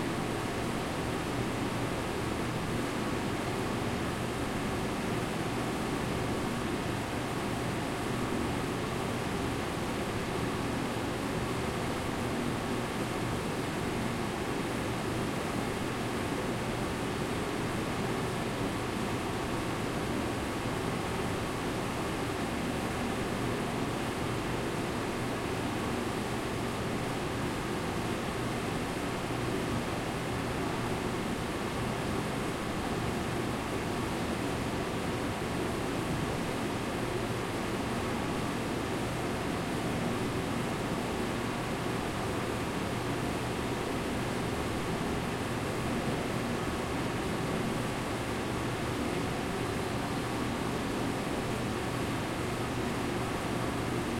RoomTone Large
Large, Loud, RoomTone